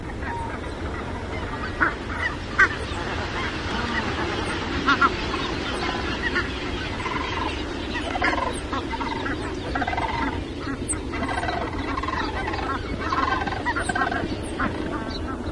Recorded January 18th, 2011, just after sunset.
geese, sherman-island